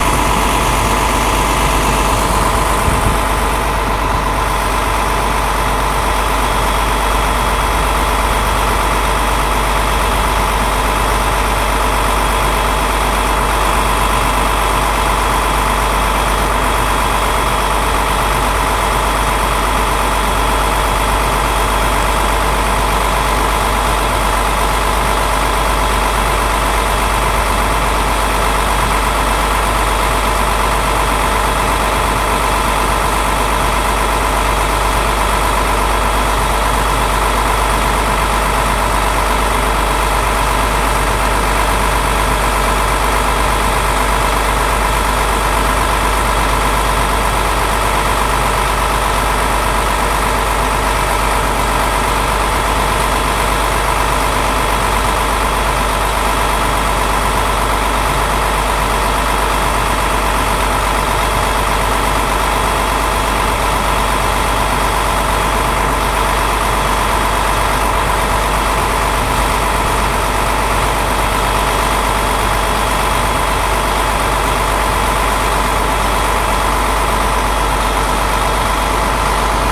One of a series of recordings made on a bus in florida. Various settings of high and lowpass filter, mic position, and gain setting on my Olympus DS-40. Converted, edited, with Wavosaur. Some files were clipped and repaired with relife VST. Some were not.